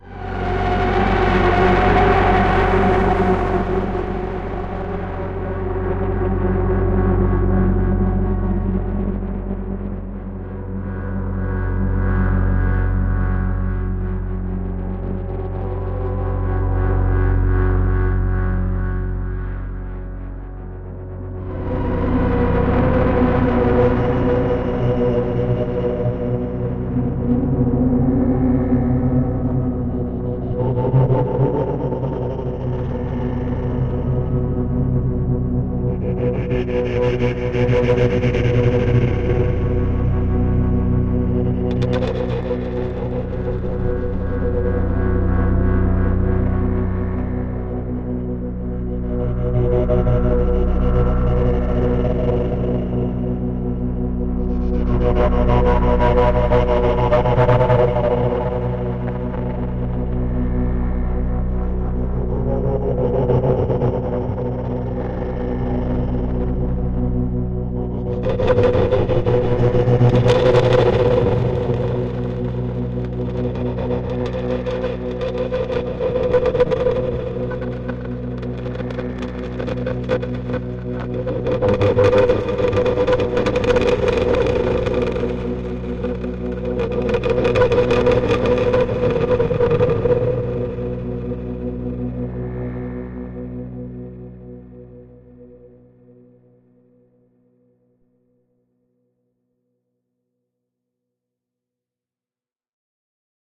moaning monks
Processed french horn sounds and drone combined with a ringmodulated and waveshaped singing monk whom I recorded in a temple in Taiwan. Produced with Absynth 4 in Logic 8.